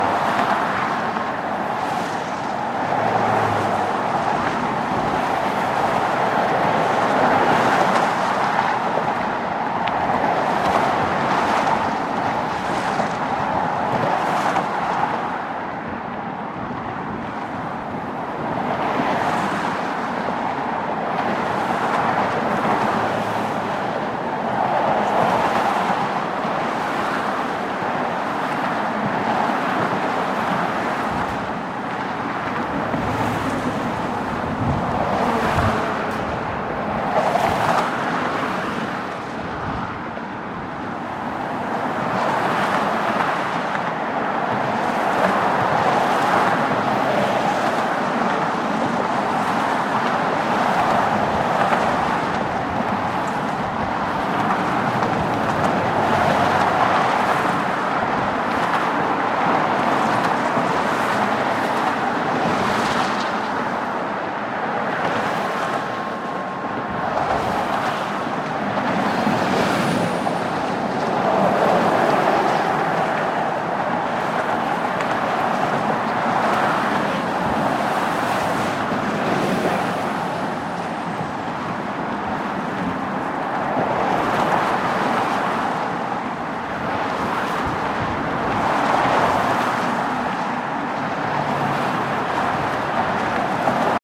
GGB 0416 Ambient Lamppost SE85 N
Ambient recording of traffic noise on the Golden Gate Bridge main span, east side pedestrian walkway near the south tower (by lamppost marked "85"). Wind was measured between 7 and 14 mph between SSW and NNW headings. Recorded August 20, 2020 using a Tascam DR-100 Mk3 recorder with Rode NTG4 wired mic, hand-held with shockmount and WS6 windscreen. Normalized after session. If I captured any evidence of the new wind noise on the bridge on this date, it is a ringing C#6 between 00:01:05 and 00:01:07 in this sample (I can only hear it on my reference phones, though I did hear it live); it's far more likely another sort of ringing or even a recording artifact IMO. I'll just need to try again with stronger NW winds...
bridge, DR-100-Mk3, field-recording, Golden-Gate-Bridge, mic, noise, NTG4, road-noise, Rode, San-Francisco, Tascam, traffic, traffic-noise, wikiGong, wind, WS6